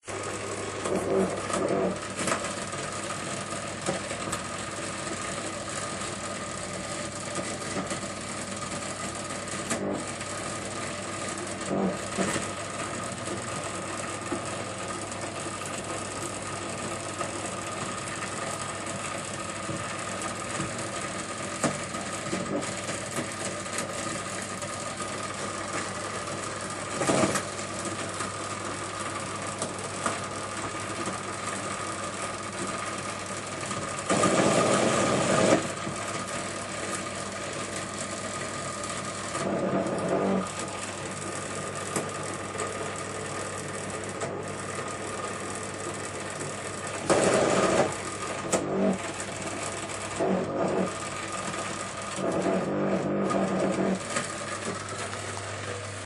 Computer - robot electronic noises
Electronic feel sounds like a half man half robot or machine at work.
machine, spaceship, android, sci-fi, space, robot, robotic, gadget, scifi, artificial, electronic, interface, computer, cyborg